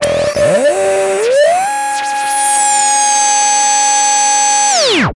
Electronic FX
Interesting sound made with zebra2 plugin :D